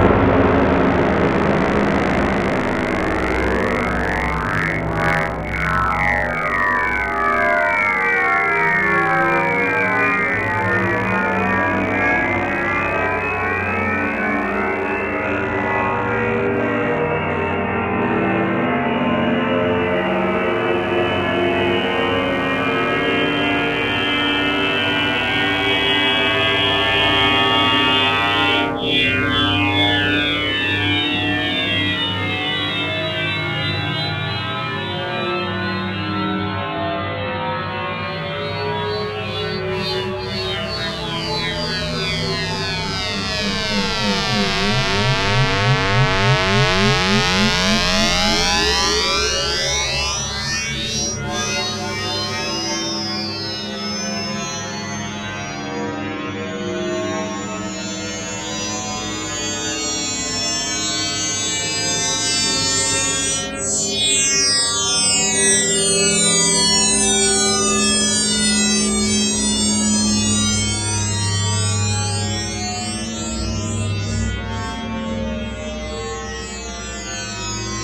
VCV Rack patch